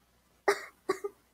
Small coughing
gas, poison, sick, Rpg, girl, voice, anime